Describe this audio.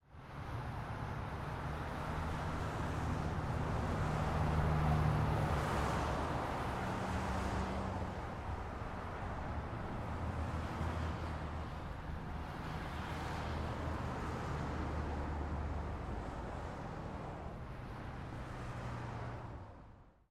Freeway Traffic
Cars passing by coming from the nearby freeway (the 405, I think).